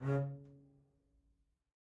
One-shot from Versilian Studios Chamber Orchestra 2: Community Edition sampling project.
Instrument family: Strings
Instrument: Solo Contrabass
Articulation: spiccato
Note: C#3
Midi note: 49
Midi velocity (center): 63
Microphone: 2x Rode NT1-A spaced pair, 1 AKG D112 close
Performer: Brittany Karlson